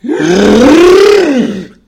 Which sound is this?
Chainsaw II
A sound chainsaw makes.
beatbox, chainsaw, cutting, machine, mouth